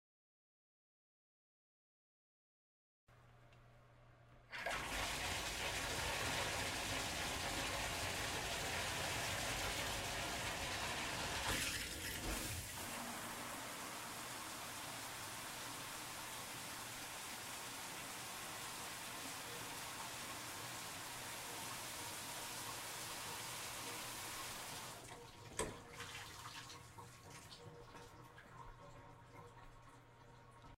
Shower Turning On - This is the sound of a shower turning on and off.
bathroom, shower, water